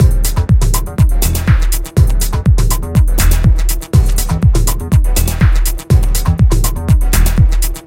Linearity Beat Part 09 by DSQT 122Bpm
This is a simple techno loop targeting mainly DJs and part of a construction pack. Use it with the other parts inside the pack to get a full structured techno track.
house, dj, techno, tech, synths, music, constructionkit, songpart